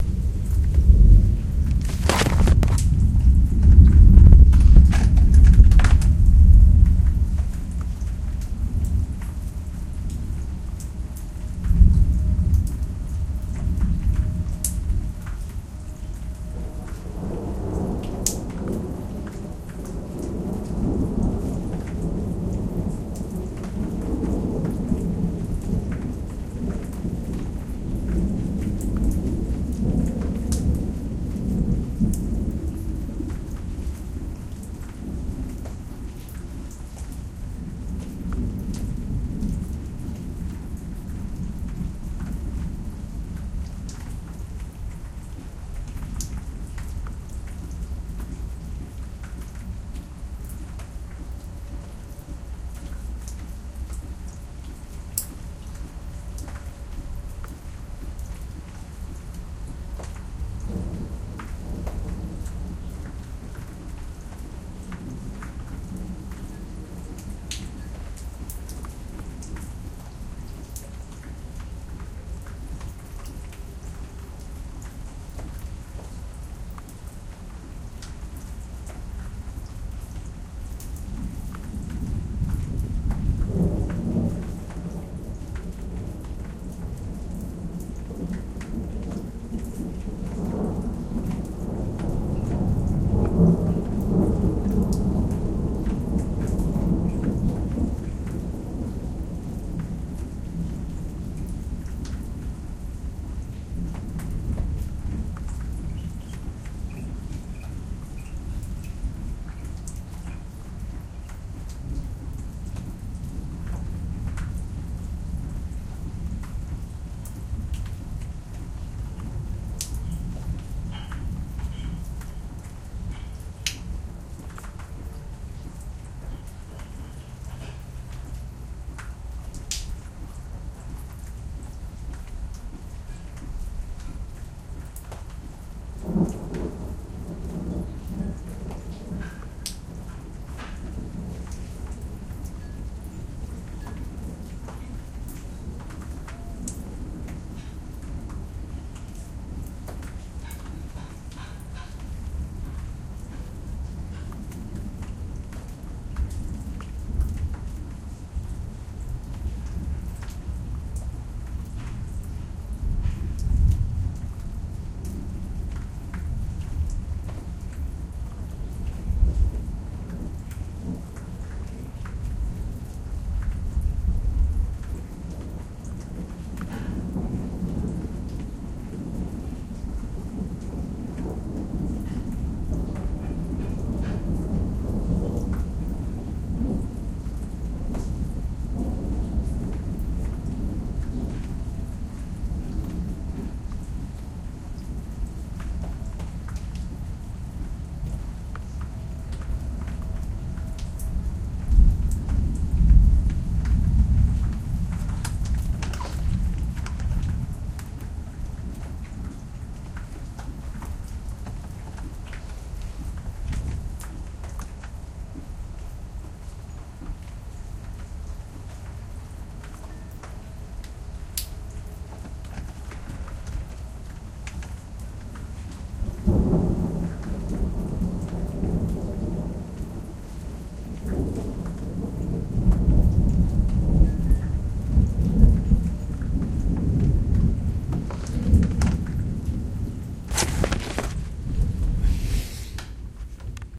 A storm approaches.
storm, field-recording, thunder